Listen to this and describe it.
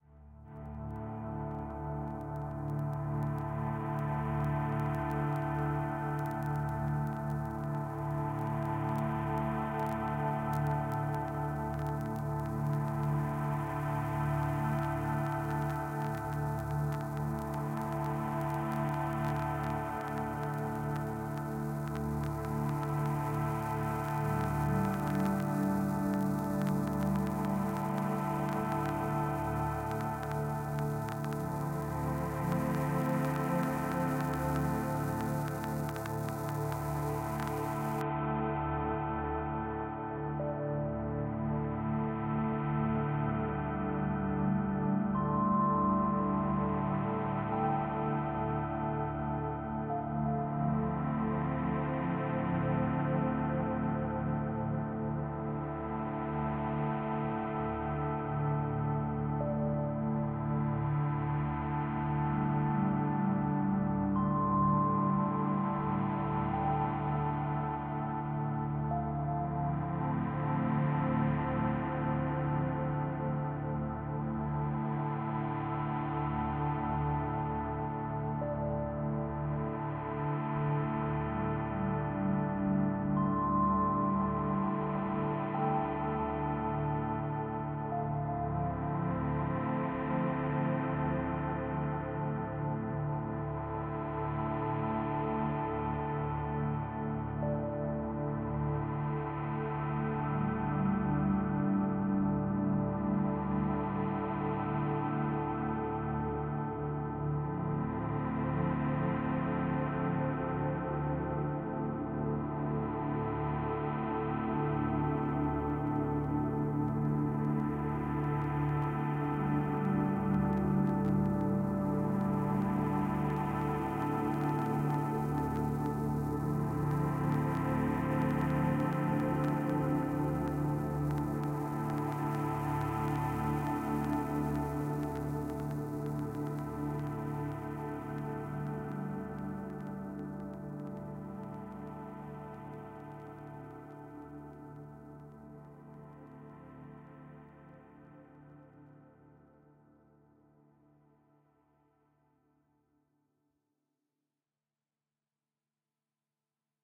This is is a track with nice sound. It can be perfectly used in cinematic projects. Warm and sad pad.
ambient, atmospheric, background, chill, chillout, classical, deep, downtempo, drone, electronic, emotional, experimental, instrumental, melodic, music, relax, space